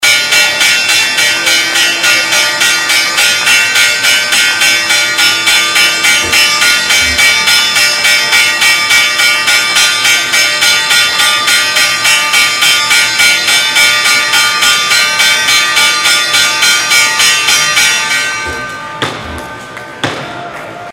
New York Stock Exchange Bell
York, New, Exchange, Bell, Stock